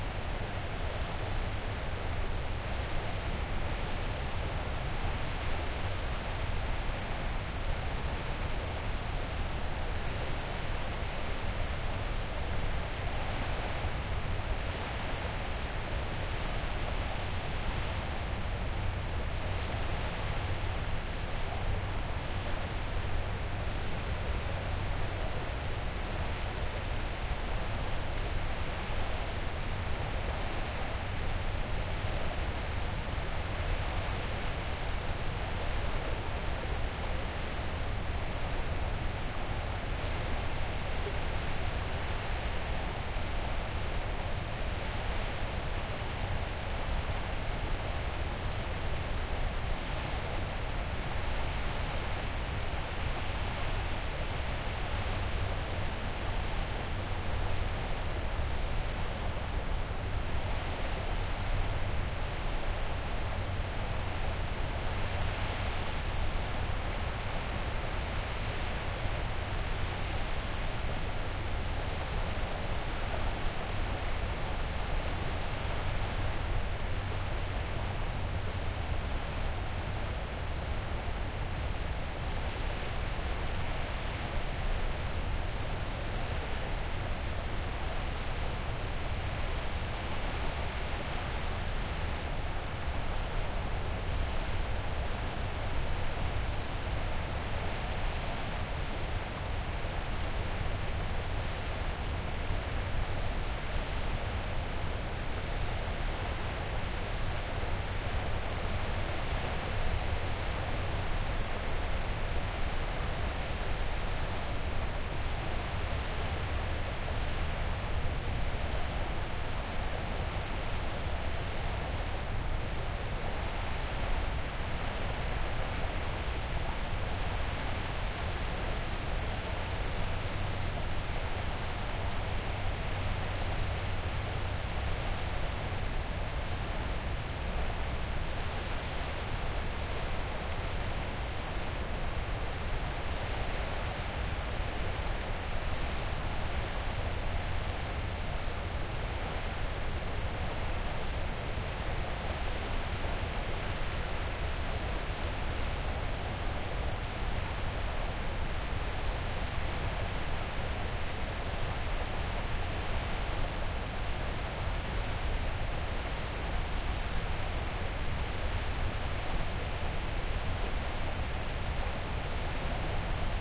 sea from the clifftop
Short track of the sea from above. Recorded on a sandy cliff on the westcoast of Denmark. Not much happening. No sea birds, just the wind! Rode NT1-a microphones, FP24 preamp into R-09HR recorder.
wind, ocean, clifftop, denmark, field-recording